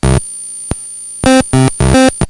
these are from LSDJ V 3.6 Compliments of a friend in Scotland.
Song 1 - 130 BPM
Song 2 - 110
Song 3 - 140
Take them and EnjoI the rush~!